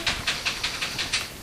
Part of a failed attempt to record work at home ambiance with the DS-40.